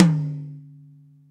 Yamaha Beech Custom Tom High
Hard stick hit on Yamaha Beech Custom drum kit tom
sabian, ludwig, paiste, cymbal, percussion, yamaha, pearl, drum, zildjian